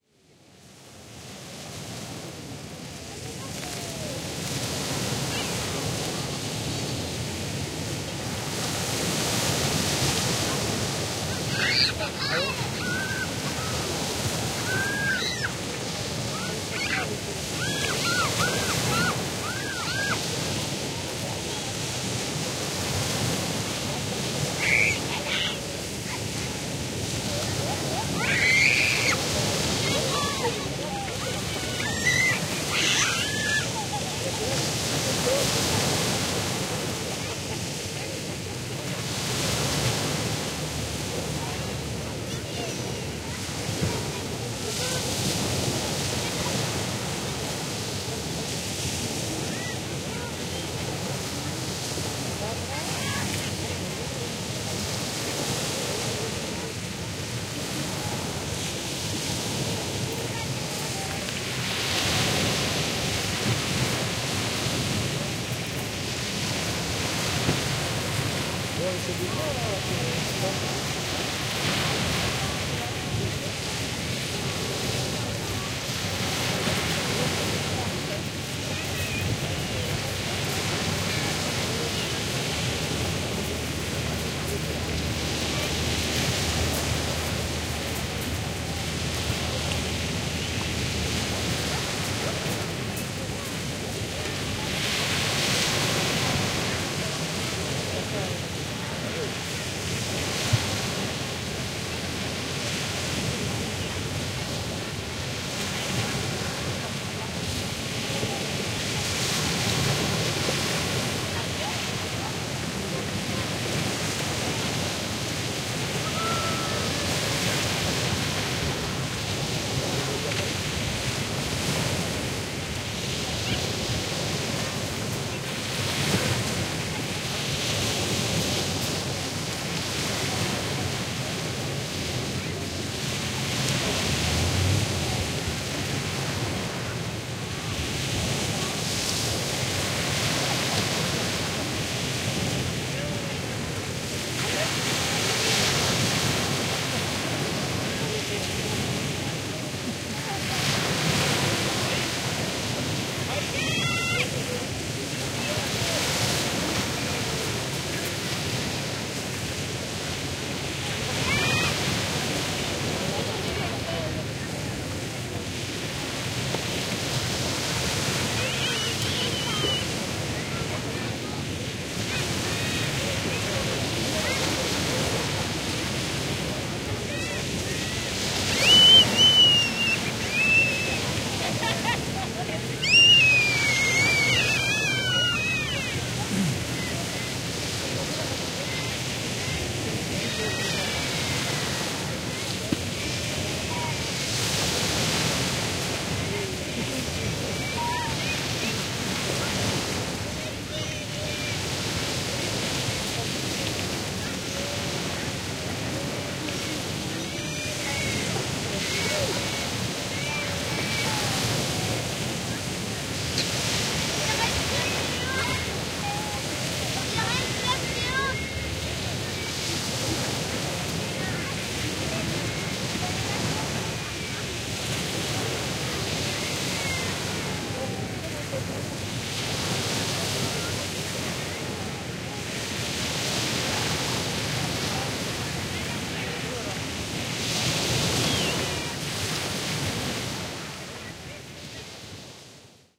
OCEAN Large beach with medium crowd
Large beach atmosphere with some crowd
ocean, coast, seaside, shore, sea, water, atlantic, crowd, beach, waves, wave